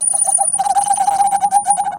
Rugoso LA 9
bohemia glass glasses wine flute violin jangle tinkle clank cling clang clink chink ring
bohemia, clang, flute, glasses, jangle, ring, tinkle